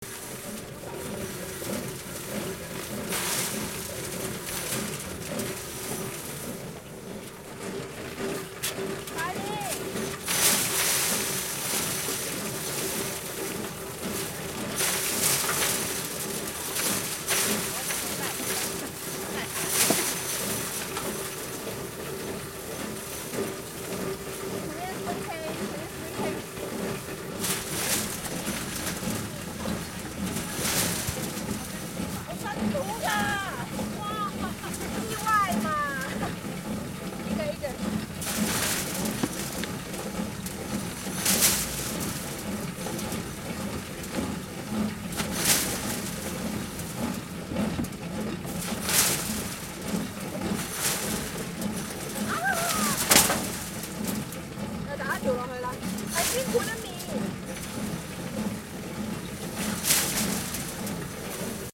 threshing machine
Stereo recording in a farm on iPhone SE with Zoom iQ5 and HandyRec. App.
Hong-Kong, threshing-machine, Rice, field-recording